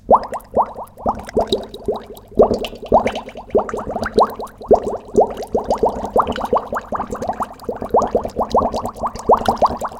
pumps.slow.echo
air bubling slow in water, with some echo added /burbujas de aire en agua, lentas, con un poco de eco